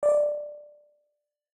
Modern Ui accept
this is a little accept sound effect i made for an old project, but might as well make it public. enjoy and have a good day
ACCEPT, INTERFACE, MENU, MODERN